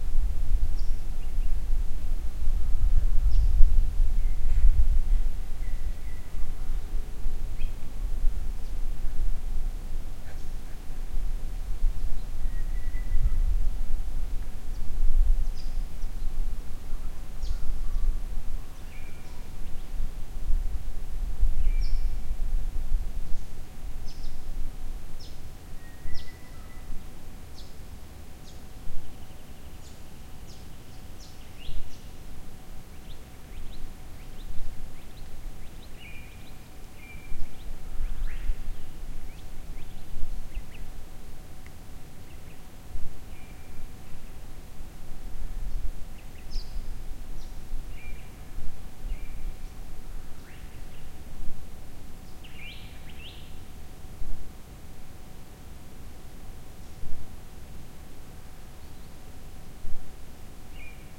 Yanga Station Atmos
A short atmos taken by the Murray River at Yanga Station. I would have liked to have recorded a much longer atmos but i had about a dozen people standing around trying to be quiet. They did a good job.
Recorded using my Zoom H4 with a Rycote wind sock.
ambience, Yanga-Station, field-recording, atmos, nature, insects, birds, Murray-river, Murray, Yanga, atmosphere, river, Australia, australian